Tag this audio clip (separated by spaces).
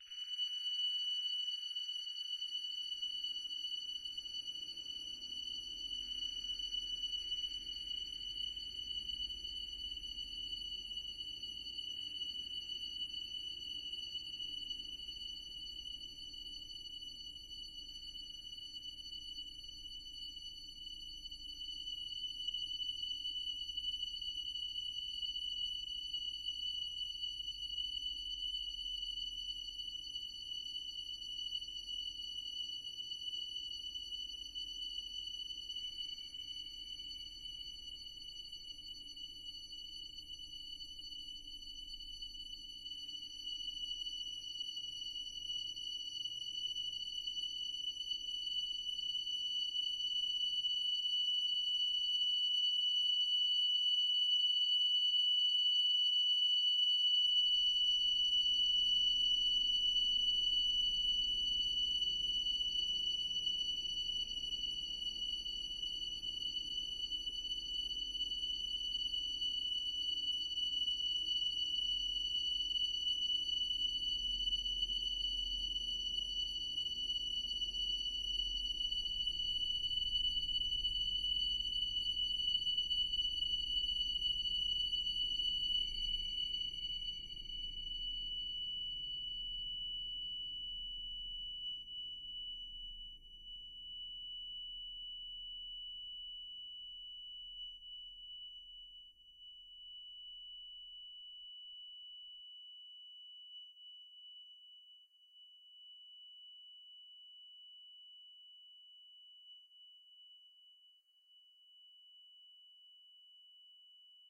ambient drone multisample overtones pad